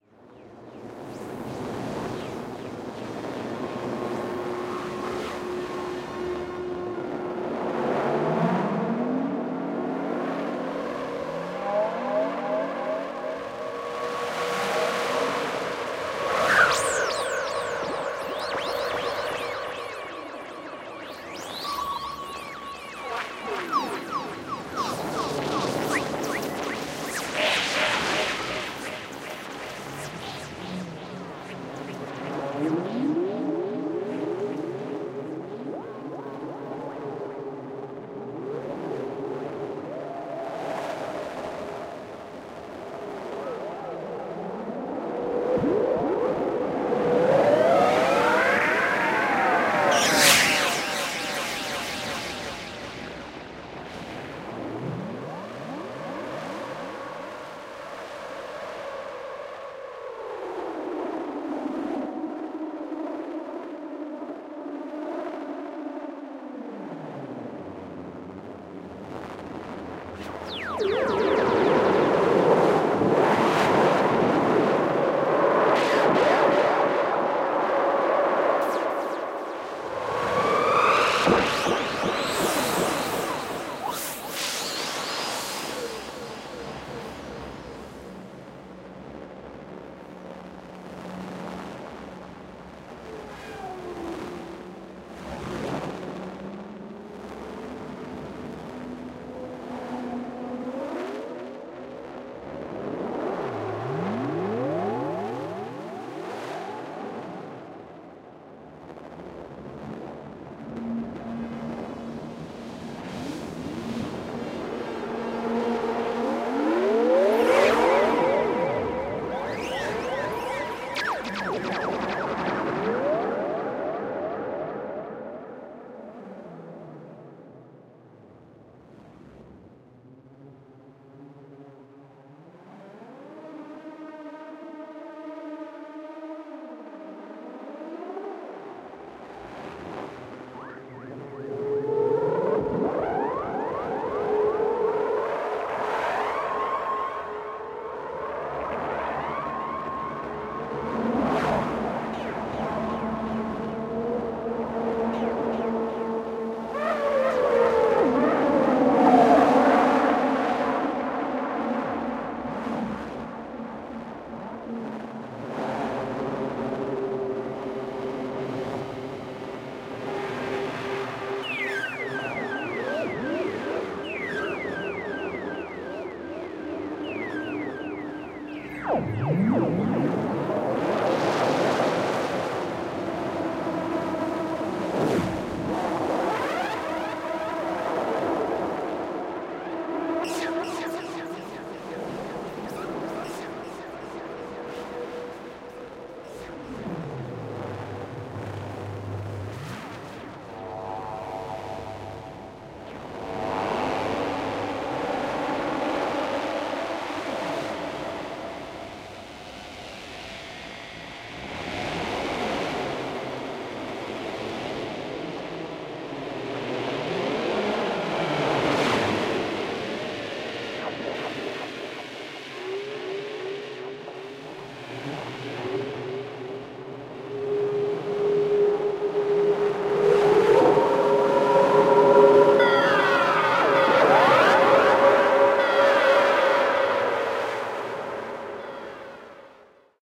ESERBEZE Granular scape 17
16.This sample is part of the "ESERBEZE Granular scape pack 2" sample pack. 4 minutes of weird granular space ambiance. A space race.
effect, granular, soundscape, drone, reaktor, electronic, space